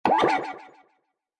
Retro Game Sounds SFX 49
sounddesign, fx, gameaudio, pickup, shooting, Sounds, soundeffect, sound, Shoot, sfx, effect, gamesound